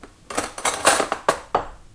cutlery rummage 3
Rummaging through the cutlery drawer.
cutlery, kitchen